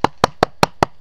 ducttapenoise tap
The sound of 5 taps on the the packageing material of a roll of duct
tape. Each beat is of moderate pitch, with a rapid decay. The overall
tempo is fast, above 200 bpm if each is counted as a beat.
beat, click, duct-tape, percussive